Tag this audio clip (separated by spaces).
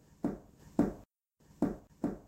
dog floor knocking tail wagging wooden